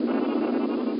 More coagula sounds from images edited in mspaint.